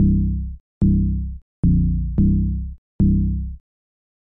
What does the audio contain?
Bass 110 BPM (2 bar)
bass, techno, fruityloops, synth, electronic, electro, loop